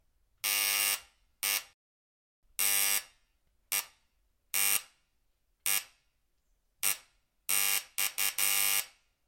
Doorbell of an old flat recorded using a RODE NTG2 and a Zoom H4N Pro.